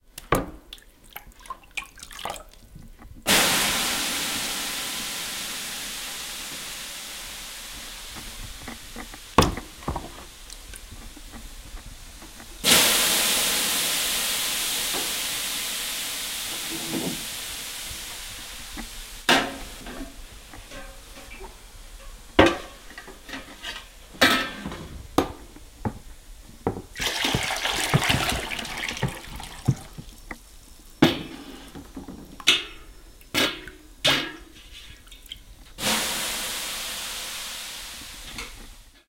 in sauna 1
In a sauna: taking water from a metal bucket and throwing it on the hot rocks. Hot steam hissing loudly. Take #1.
steam, bucket, sauna, water, metal